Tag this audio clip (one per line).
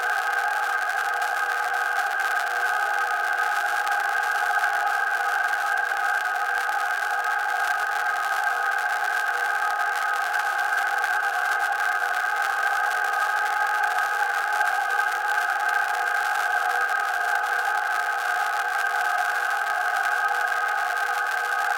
ambience
atmosphere
soundscape